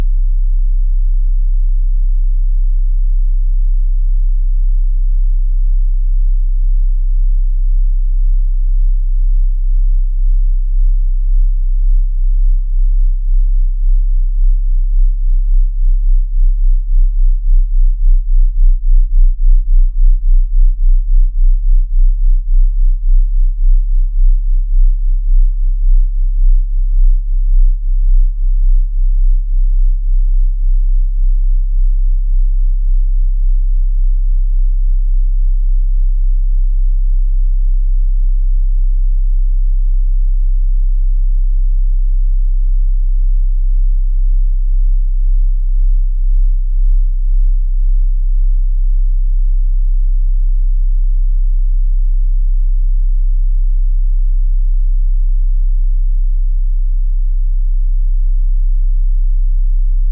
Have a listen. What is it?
Low sub drone with closely modulated frequencies to create prominent beating.
bass, beating, deep, drone, sub